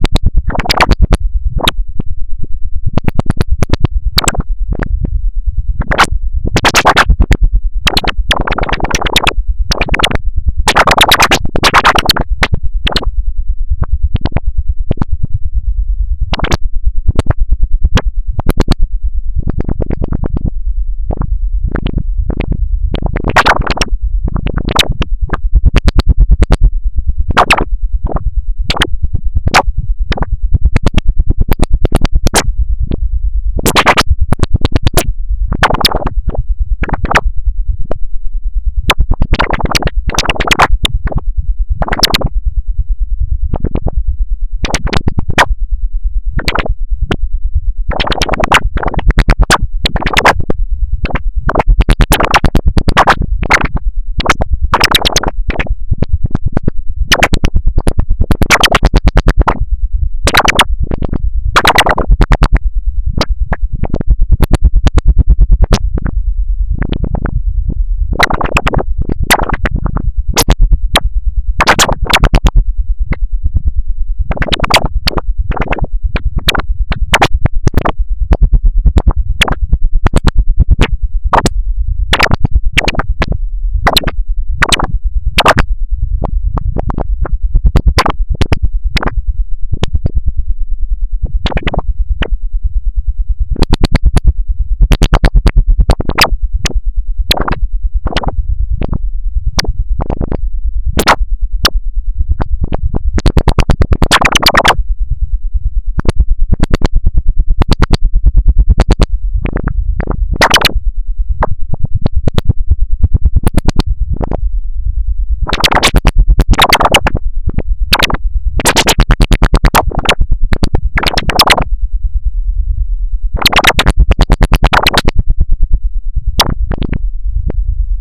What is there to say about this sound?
This sound is a really slippery one. It consists of two saw tooth waveforms set in different pulse lengths, the audio out is then fed straight into the filter section of the subtractor. LFO 1 controls the filter as well. Another subtractor uses it's LFO to control the FM amount on Subtractor 1.